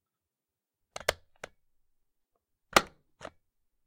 Fan switch on off
Desk fan being switched on and off
click, desk, fan, off, switch